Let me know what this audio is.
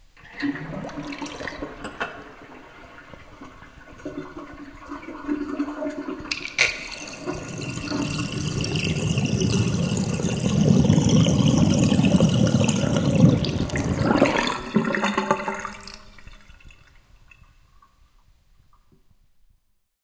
Kitchen-Sink-Drain-2
This is a stereo recording of me draining my kitchen sink. I filled my sink about half full (it is a dual, stainless steel sink). It was recorded with my Rockband USB Stereo Microphone. It was edited and perfected in Goldwave v5.55. I pulled the stopper from the sink, and within 5-8 seconds, a vortex forms, and the rest is history! This is gotta be one of my top 10 clearest recordings yet! Enjoy.
sink, vortex